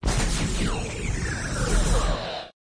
Power Failure
Lost Power